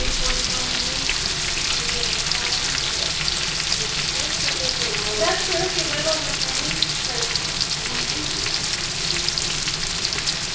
Listen to the sounds of the turkey dinner being cooked on thanksgiving recorded with the DS-40.